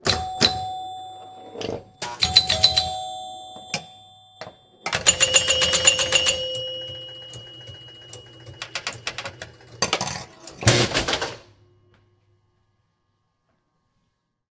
Classic Pinball Gameplay
This is a short game play of a classic 1970's era pinball machine (Quick Draw).